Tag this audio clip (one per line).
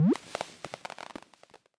Explosion
fireworks
spark
collision
up
jump